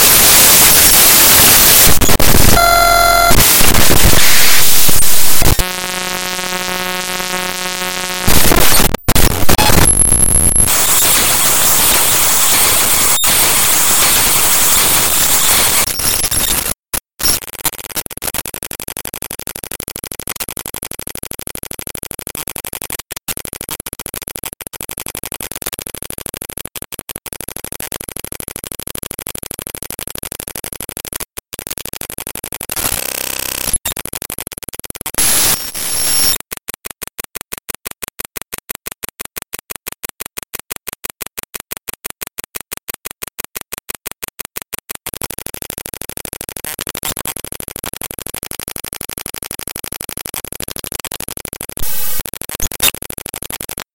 Raw import of a non-audio binary file made with Audacity in Ubuntu Studio
distortion, binary, noise, electronic, loud, raw, random, extreme, file, glitches, glitchy, data, computer, harsh, glitch, digital